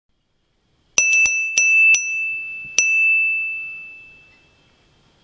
Large Glass Bell 2
A beautiful Glass Bell!
Beautiful, Bell, Glass